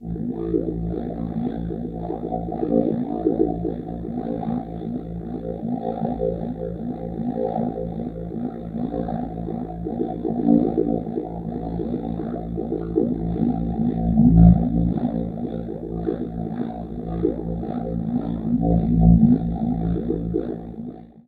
drone waterpiperidoo
could have been a didgeridoo recorded through waterpipe if it wasn't synthesized somehow.